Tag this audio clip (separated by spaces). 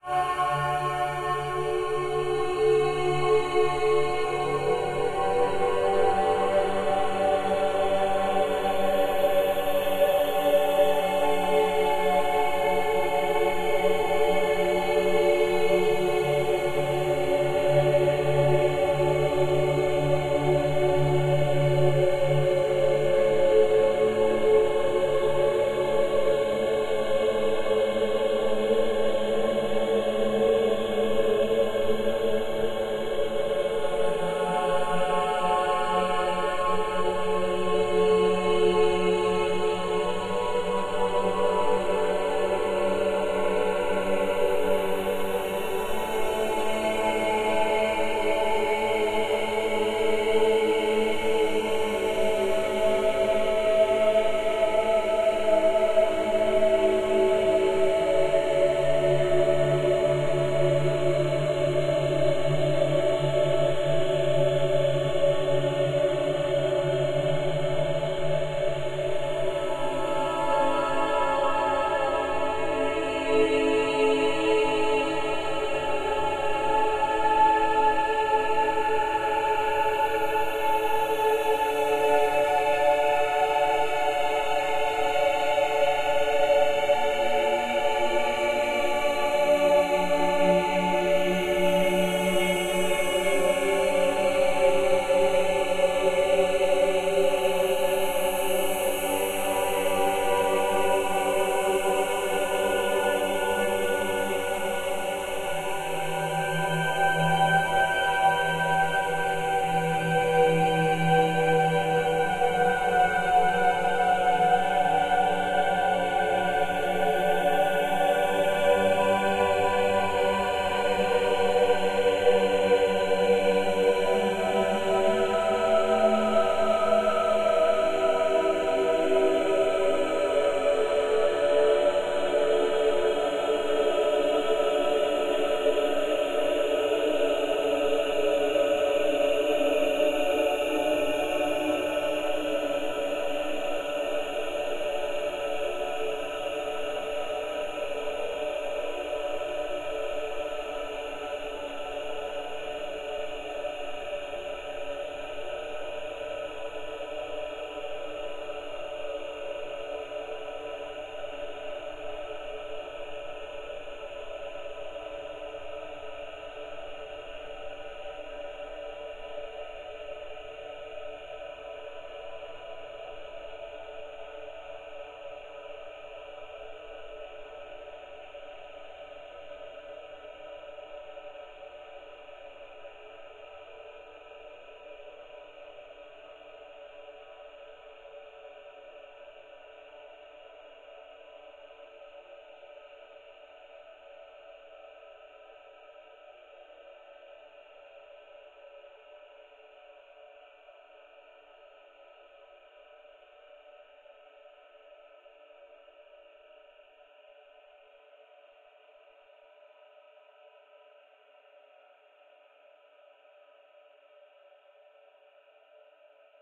voice stretched HAPPY MUSIC Freesound15Years birthday synthetic GROUP ambient TECHNOLOGY wave